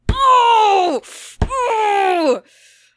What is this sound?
so anger
making sounds of anger while pounding the desk with my fist
thunk, hit, grunt, anger, fist, pound, growl